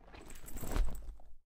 A combination of different sounds, making a sound of a backpack being put on or taken off.
back, backpack, foley, pack